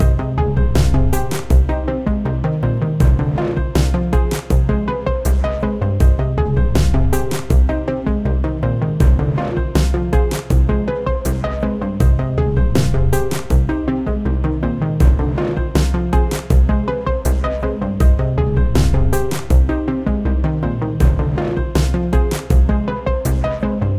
Dilemma - Music Loop
Dilemma is a 4 x 4 looping music piece that I came up with while working on a project. This piece uses original melody notes over a synth, piano notes that sustain the feel, and a deep & unobtrusive yet powerful pattern of beats that I have designed and programmed.
You can consider this as a 4 bar loop instead of 4x4 if you need to chop and make it loop further to suit it in as a longer piece, and the fading notes on each bar will fit appropriately.
Cheerz,
original, mysterious, dilemma, suspense, beats, electronic, drama, RAME, dramatic, movie, dark, 80bpm, loop, piano, synth, music, mQube, slow, cinematic, mystery